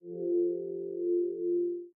samples i made with my Korg Volca FM
fm, frequency, hardware, synth, volca